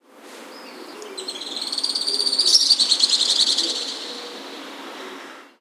Alpine swift screeching. Recorded in downtown Saluzzo (Piamonte, N Italy), using PCM-M10 recorder with internal mics.